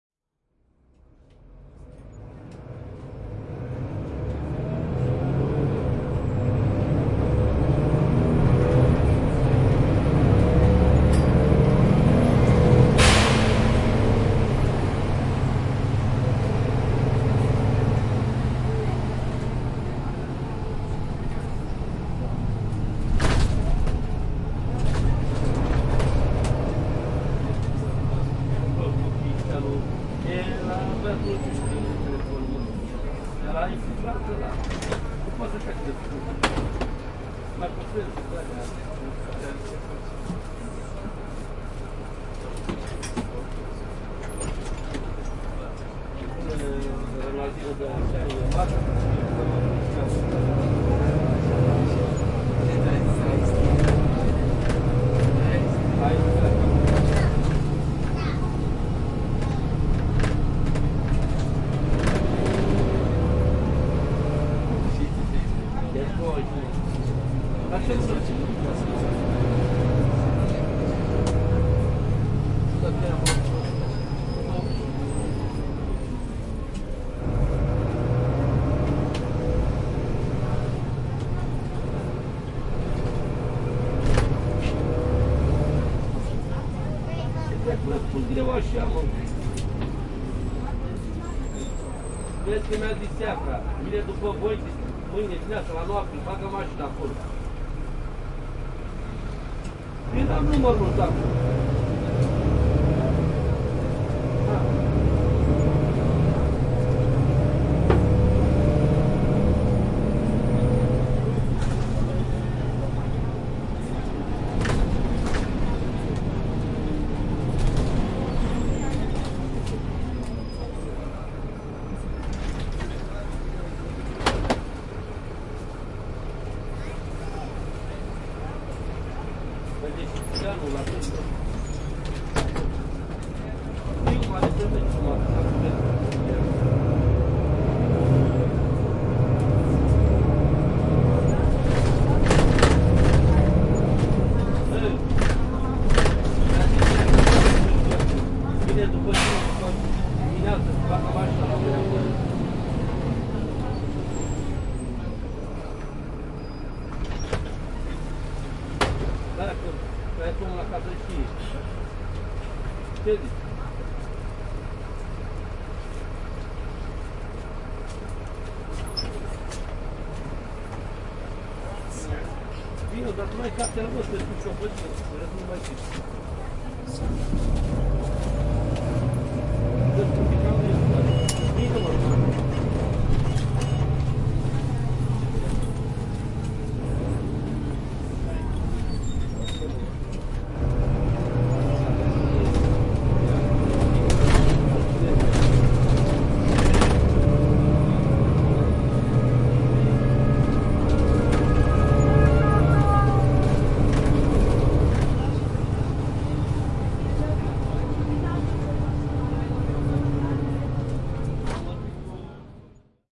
A field recording inside the bus in Rome. With Zoom H4
people, city, bus, traffic, cars, field-recording